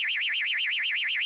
SCIAlrm 8 bit high ufo
8-bit similar sounds generated on Pro Tools from a sawtooth wave signal modulated with some plug-ins
8bit, alarm, alert, beep, computer, robot, scifi, spaceship, synth